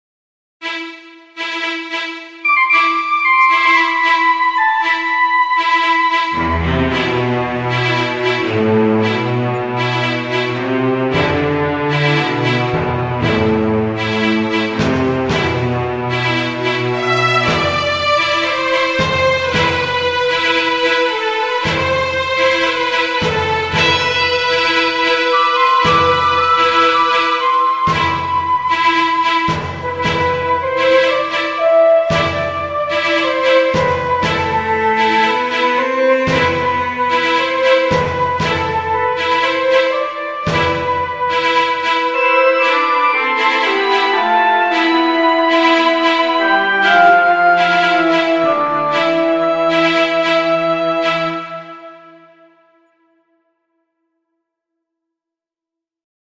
Path of a Warrior
Drums, Flute, Strings, Woodwind